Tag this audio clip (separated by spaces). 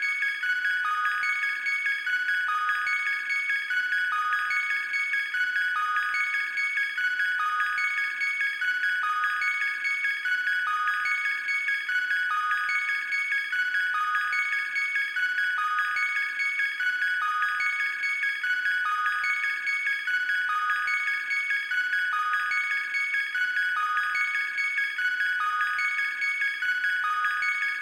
Alien Ambient Audio awe Background Dub Dubstep Effect Electronic Funny Noise Sci-Fi Sound Spooky suspense Synth Weird wonder